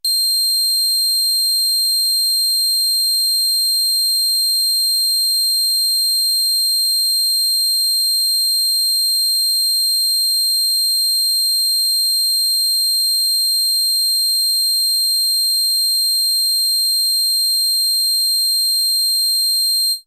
Mopho Dave Smith Instruments Basic Wave Sample - SAW C7